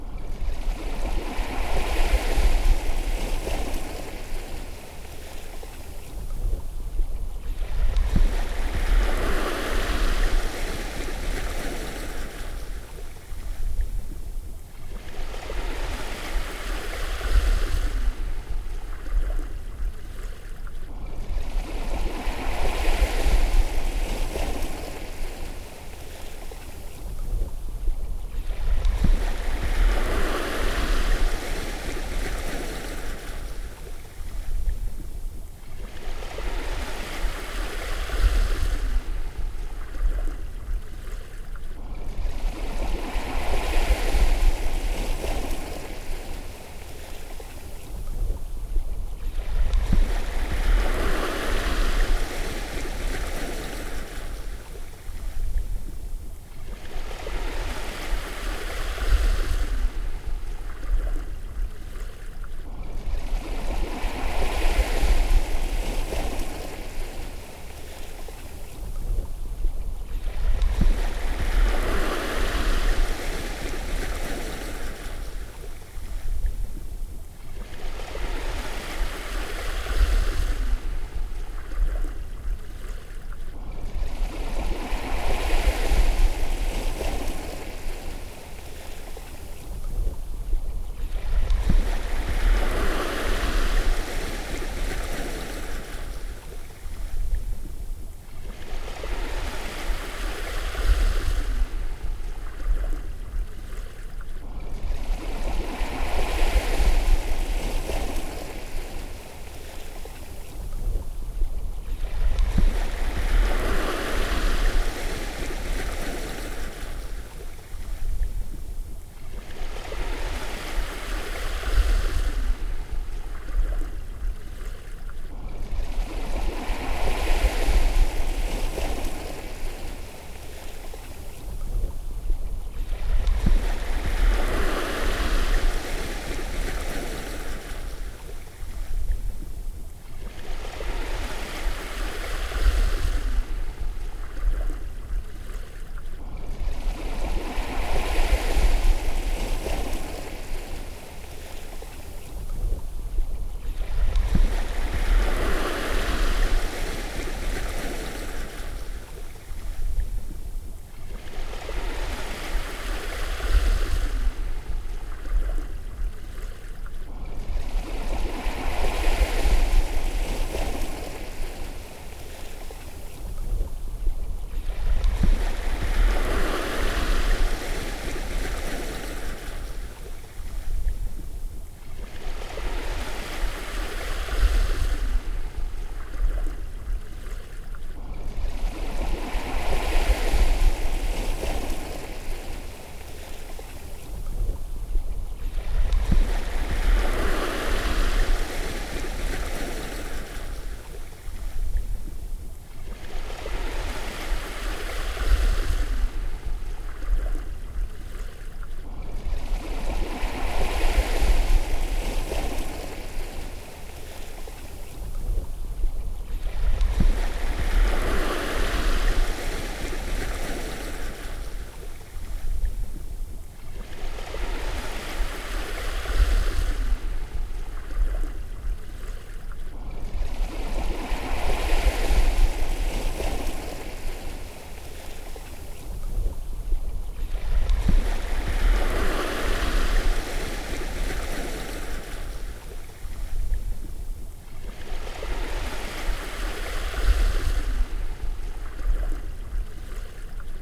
Agua Olas
Sound generated by recording sound of the waves of the sea
waves, water, scl-upf13